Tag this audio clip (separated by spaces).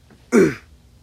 death dying Grunt hurt movies